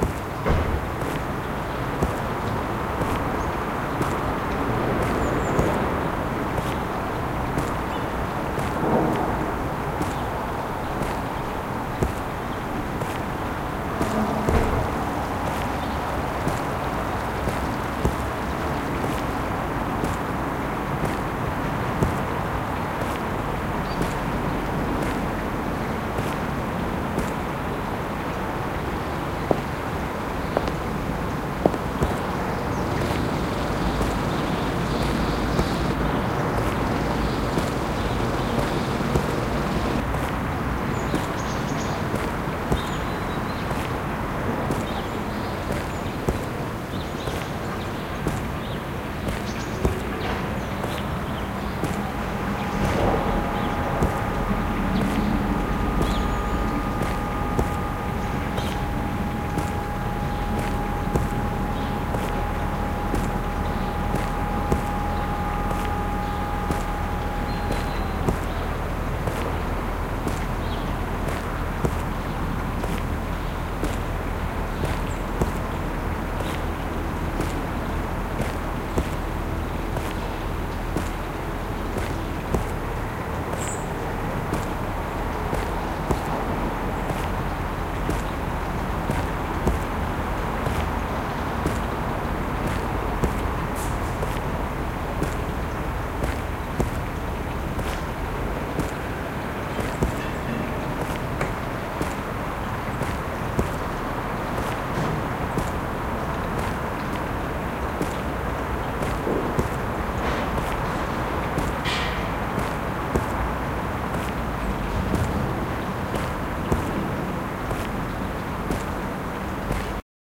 Multiple sounds, footsteps, fountain, helicopter
multiple, random